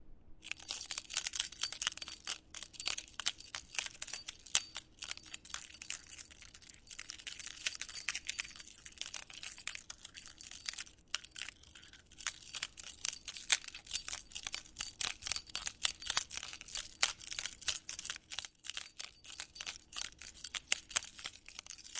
Recorded using a Zoom H6. Sound made taking car keys and house keys on a key chain and shaking them.
Rattling, Breaking, Shattering, Chains, Annoying, OWI, Keys, Silver, Coins